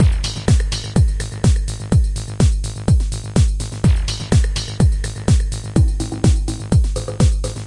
Sicily House Full
Sicily House Beats is my new loop pack Featuring House-Like beats and bass. A nice Four on the Floor dance party style. Thanks! ENJOY!